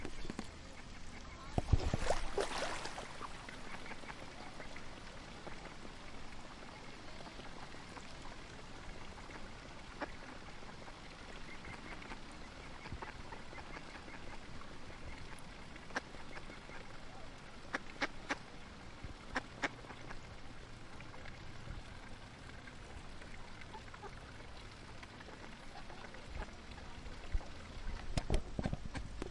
At the Park
Park, Ducks, Water, Children Voices, Birds, Fountain. Recorded with Zoom H2 on the 4. of October 2015 in Hellburnn, Salzburg, Austria. Not edited.